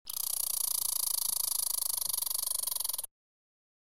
This recording of a Red Squirrel Vocalizing came out of a mass recording of sounds at a bird feeder. This was captured on a Zoom H4n Pro Handy Recorder using the built-in stereo mic configuration set to the 90-degree position. This was done on a relatives property in Northern Wisconsin.
field-recording, red, squirrel